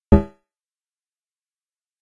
Simple Beam Click Sound
UI sound effect. On an ongoing basis more will be added here
And I'll batch upload here every so often.